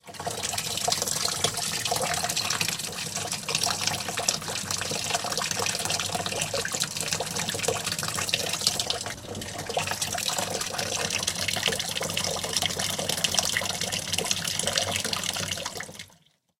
Washing Machine 7 Filling
bath, bathroom, domestic, drain, drip, dripping, drying, faucet, Home, kitchen, Machine, mechanical, Room, running, sink, spin, spinning, tap, wash, Washing, water